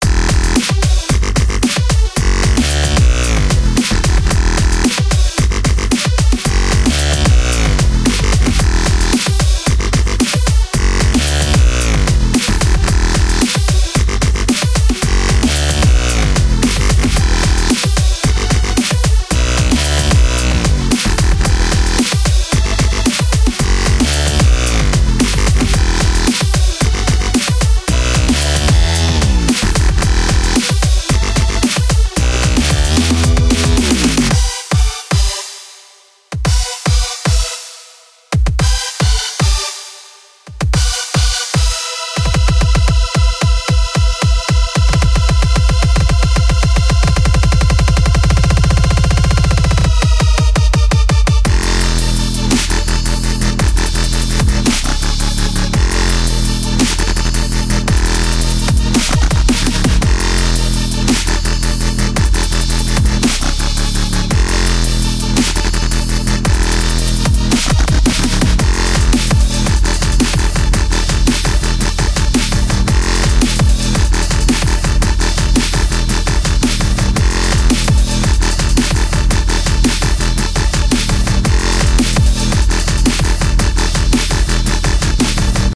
daw 2 loop
Just pieces of a track i started, i cut out the fades and as many effects as i could so you can cut pieces out. If you would like versions with just the bassline, drums synth leads. :D
DJXIN, DJZIN, Electro, bass, clip, club, dance, dubstep, fl, free, glitch-hop, house, loop, low, minimal, p, rave, techno, trance, w, wobble